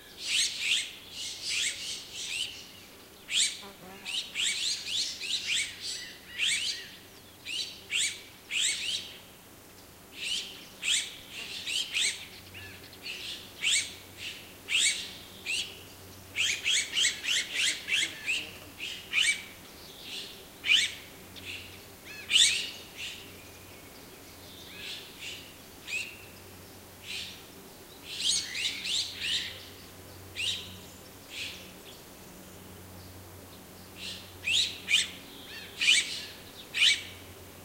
20060524.azure-winged.magpies.distant
noise made by a group of azure-winged magpies, as heard at 30 m / canto de un grupo de rabilargos a unos 30 m
birds, donana, field-recording, magpie, nature, spring